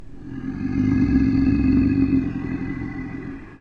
This sound is an extremely basic monster growl that I created using gold wave.